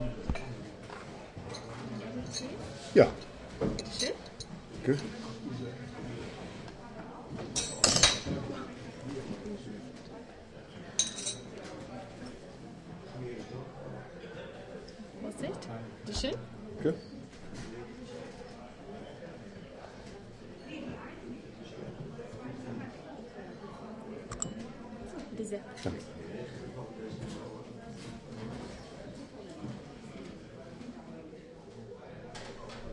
the waitress at hotel seeblick, thuelsfeld reservoir, serves tea. short german conventional dialogue between guest and waitress. unaltered footage recorded with zoom h2.